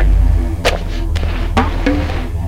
odd percussions with cello ?
atmosphere, baikal, electronic, loop, percussion